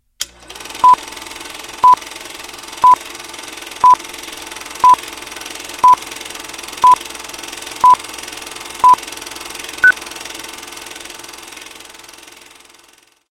Sound before old film.
Edited with Audacity.